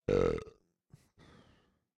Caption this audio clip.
Man's belch with blow out. Close mic. Studio
belch, disgusting, Human